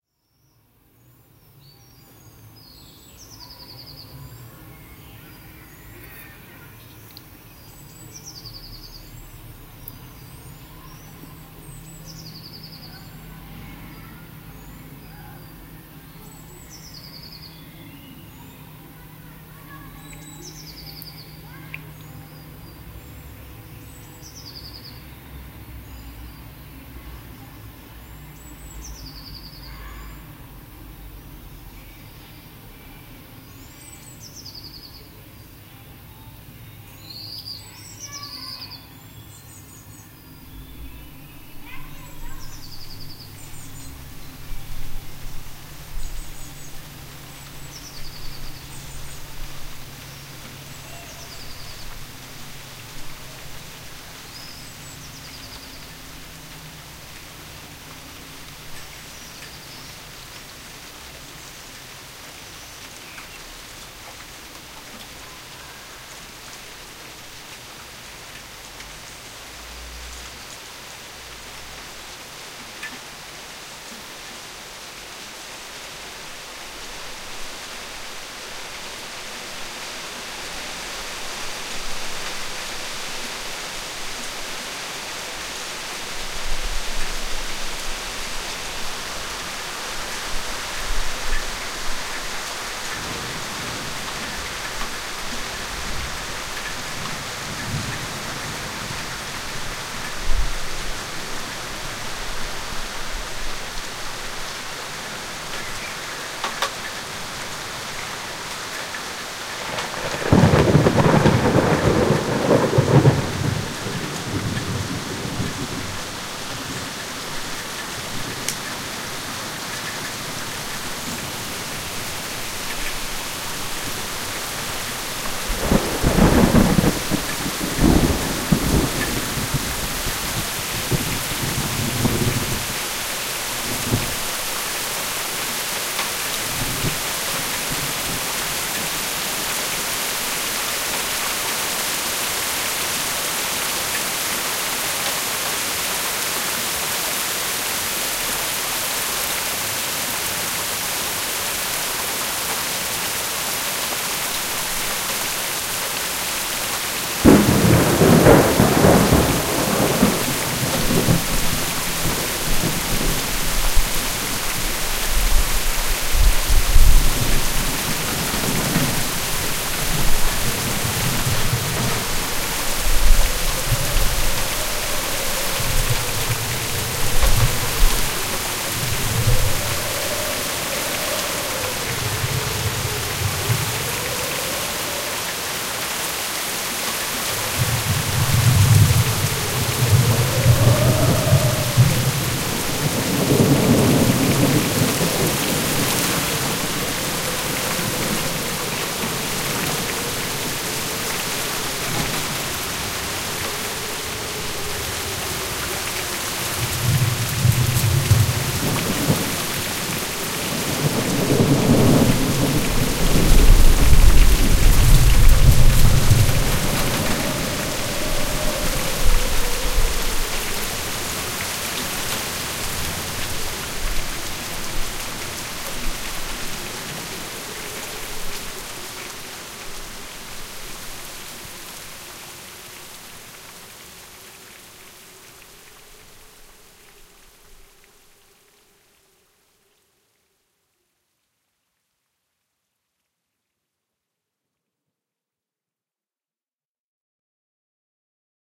The Great Song of Summer Rain - STEREO
The whole "song" of a great summer thunder-storm. Extrem stereo of two microphones 2 metres appart.
Thunder, urban-recording, nature, Wind, Rain, outdoor, field-recording